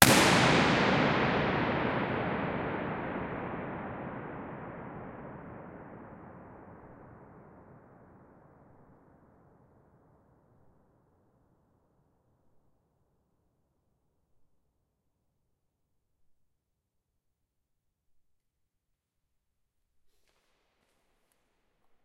Balloon burst 1 in Emanuel Vigeland mausoleum
A balloon burst in the Emanuel Vigeland mausoleum, Oslo, Norway.
Emanuel-Vigeland, reverberation, burst, balloon, architecture, mausoleum, norway, oslo